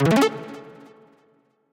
Just an easy synthesized WIN sound in FLS11.1.1.
BTW Harmor is the synth !